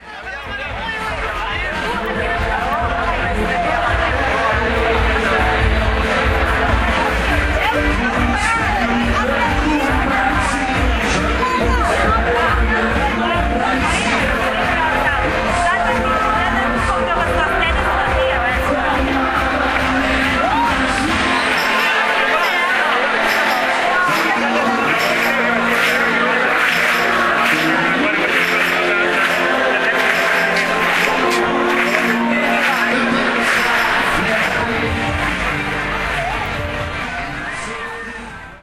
This sound was recorded with an Olympus WS 550-M and it's the ambient sound of a Saturday night during the Acústica Festival which is made every year in our city, Figueres.